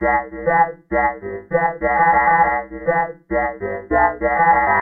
wah,wah,wah 100 bpm
Synth ran through a wah and BPF
Synth, wah, Bandpass